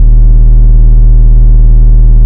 an electronic machine running